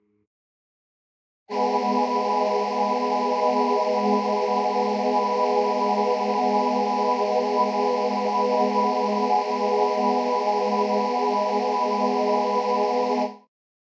This sample is part of my zynaddsubfx choir pack. All samples in the pack were made using a preset from the zynaddsubfx vst instrument, i didn't have a working midi keyboard at the time so i named the samples based on which keys I pressed on my laptop to make the sound and what octave the synth was set at.